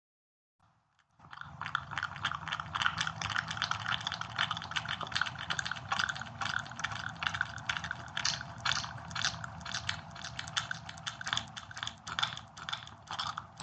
Liquid noise almost like water sound, but really is eating a sandwich.
cinema, creepy, drama, dramatic, film, filming, horror, illusion, intro, loop, night, noise, scream, solo, talking, vanished